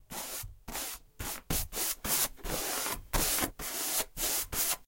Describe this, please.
Paint Brush on Canvas
A paintbrush stroking fast over a canvas. Recorded with a Sony IC.